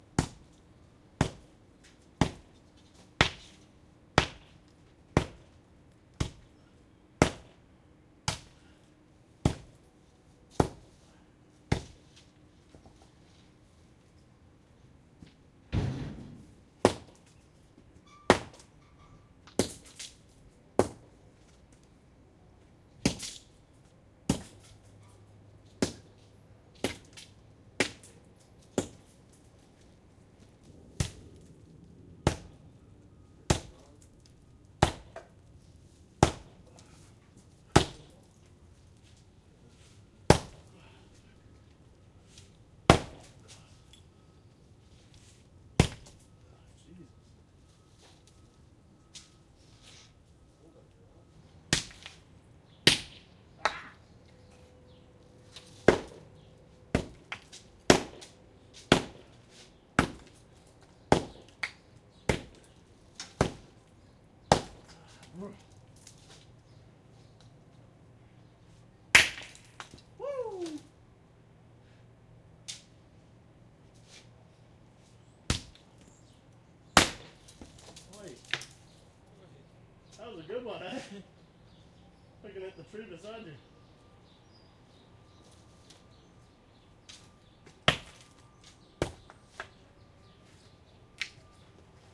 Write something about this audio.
121120 Hammering, pounding, breaking rocks, voices, Toronto
Hammering, breaking apart rocks, stone. Sony M10. 2012.
construction, hammering, pounding, rocks